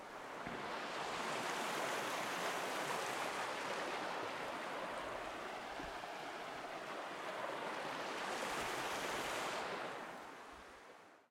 Water Rushing
Sound of a creek with flowing water.
creek, flow, flowing, liquid, river, stream, water, water-rushing